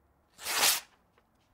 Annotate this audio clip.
ripping paper
intermediate, class, sound